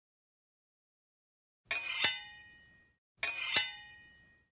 metal scrape02
Knife, Metal, Scrape, Sharp, Sword